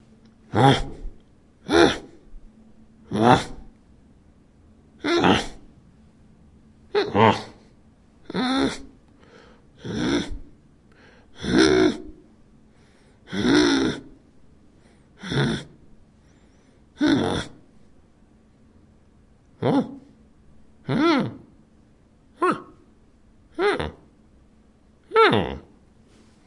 Disappointed and happily surprised creature
We did this little recording for a short movie. The creature in our movie was a dwarf that was disappointed at first, then happily surprised.
happy, disappointed, sad, happily, surprised, funny, frustration, frustrated, creature, midget, dwarf, surprise, grunt